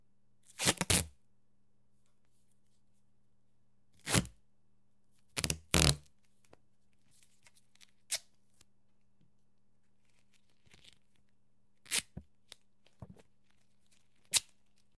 Duct tape unraveling and ripping.
ripping, unraveling, foley, roll, duct